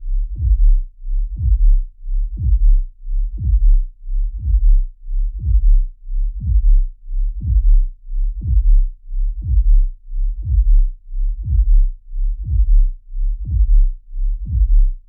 heartbeat regular
took a sample of a tr909 kick drum, stretched it, added some reverb, bass boost, compression split the track and offset it slightly to create a left to right pulse
heartbeat, body, beat, pulse